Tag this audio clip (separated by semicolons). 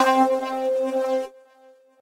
reaktor; multisample; overdriven; lead